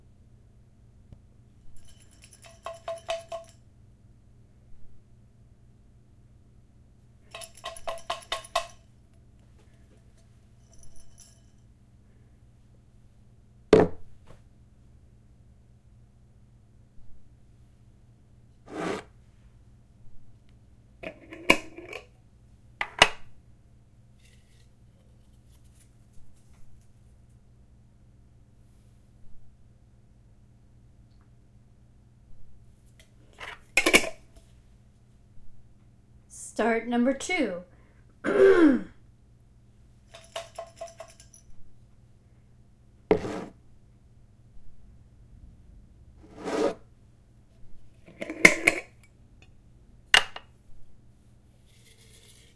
jar open close
Foley recording of picking a jar up off a table, opening it, closing it.